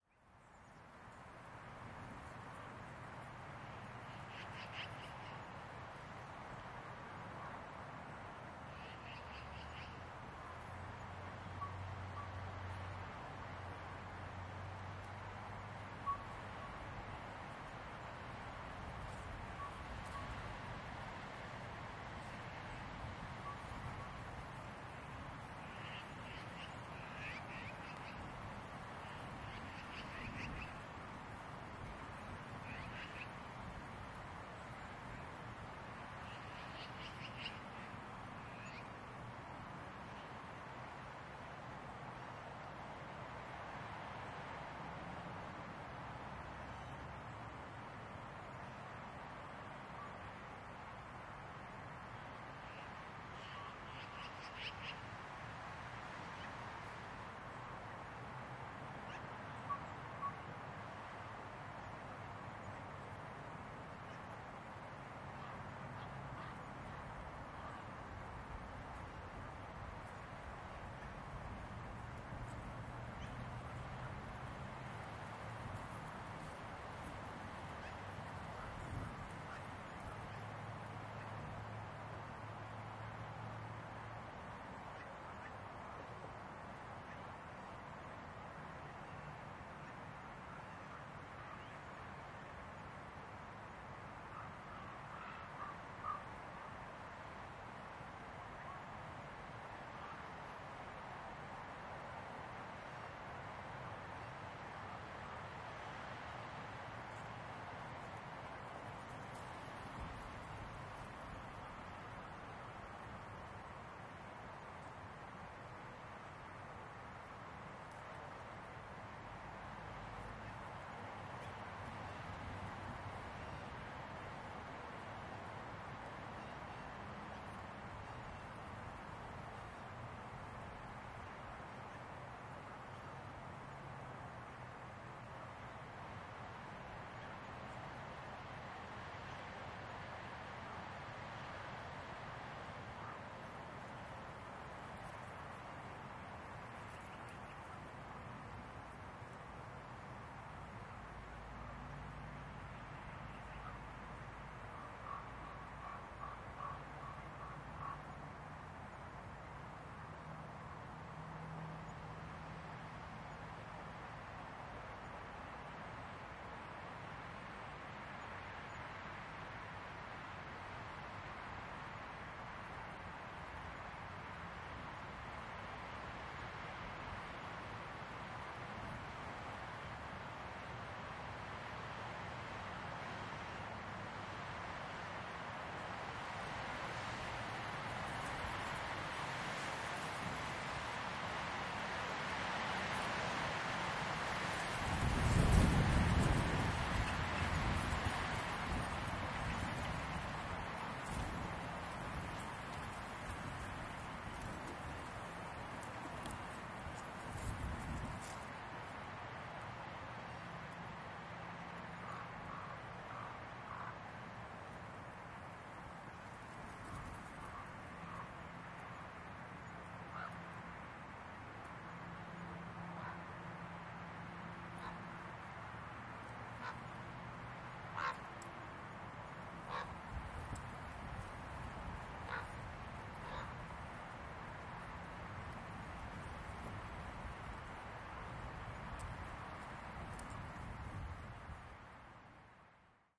winter ambience leaves, wind, crow, birds
Recording of a winter ambience in Banff, Alberta. Wind, crows, and various birds can be heard. Recorded on an H2N zoom recorder, M/S raw setting.
birds, field-recording, ambience, leaves, crow